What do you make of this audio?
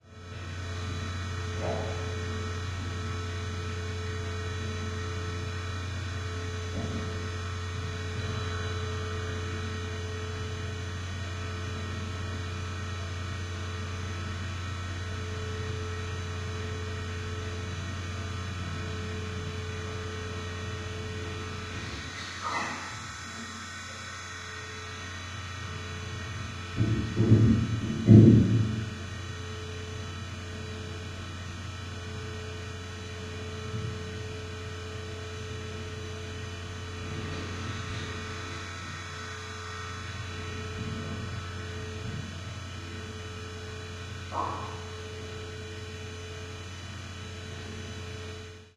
Machine Hum Clean
The sound of a machine humming in the background.
clean, fridge, hum, machine, refrigerator, robot